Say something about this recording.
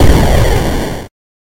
A high-pitched explosion or missile fire.